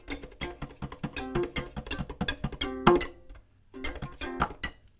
random noise made hitting a violin with the hand. recorded with Rode NT4 mic->Fel preamplifier->IRiver IHP120 (line-in)/ruidos hechos golpeando un violin con la mano